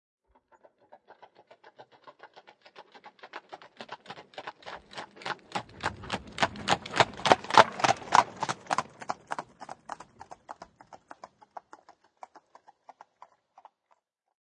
Horsewagon pass fast#2
Horsewagon from 18th century